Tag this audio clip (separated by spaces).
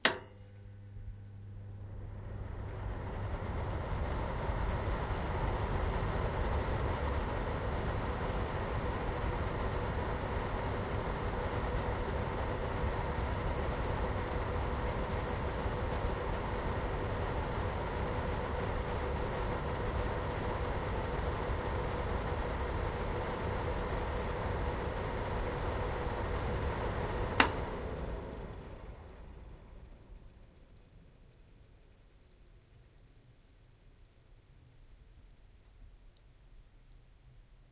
fan switch ventilator